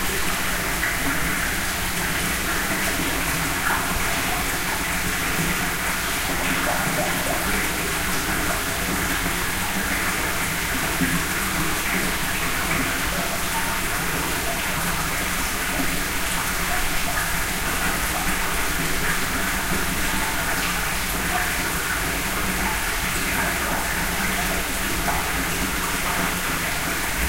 Water flowing in an enclosed spring house along the Watershed Trail, Nolde Forest, Mohnton PA.
Recorded with a Tascam PR-10.